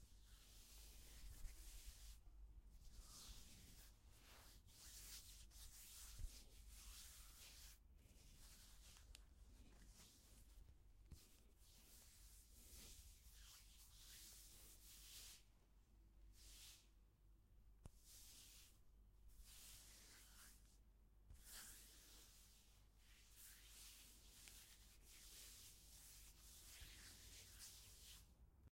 Me touching my own skin, with dry autumn hands. Sounds more like a person caressing his/her own beard.